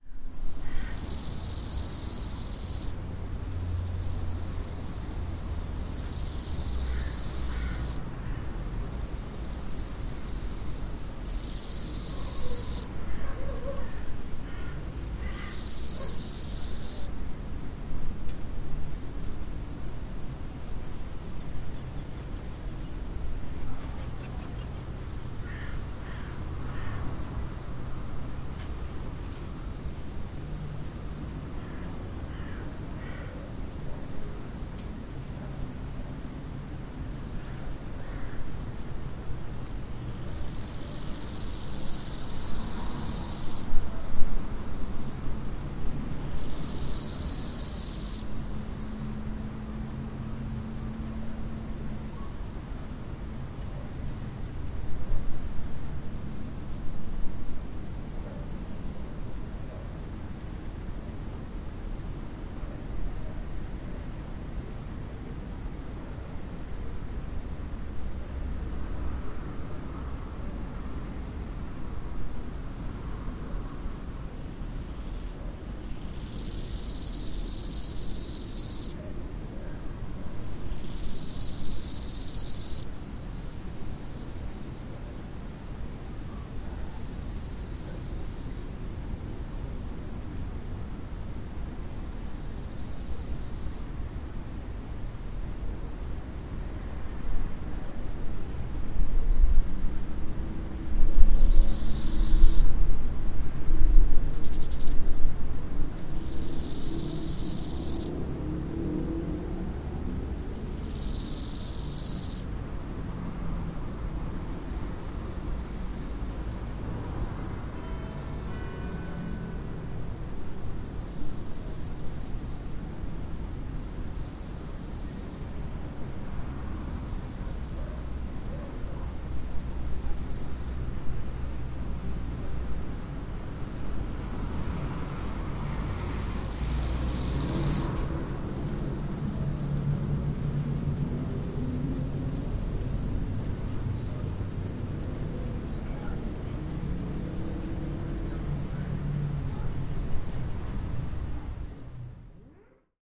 Après-midi d’août, ville, quartier calme. Dialogue entre trois insectes. Voitures et chiens au loin.
August, afternoon, quiet town area. Three bugs chatting. Cars and dogs in the background.